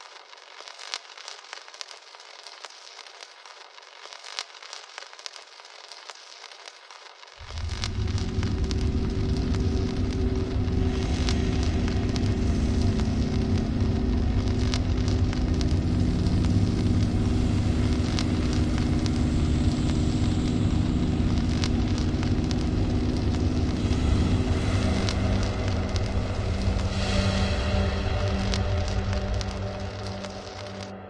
Sci-fic; after explosion
a space ship ambition after explosion
ambition, electricity, sci-fic